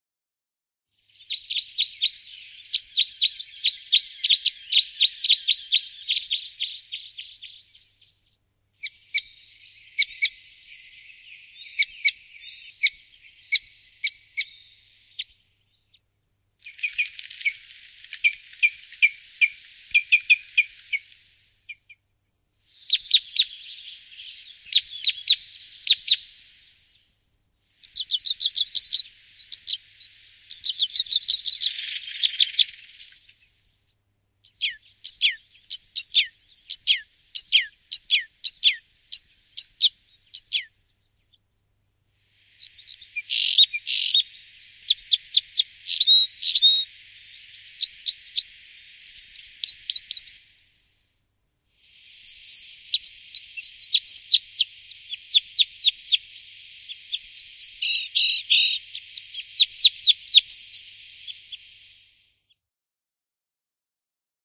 bird sound Loxia curvirostra
sound, bird, curvirostra, Loxia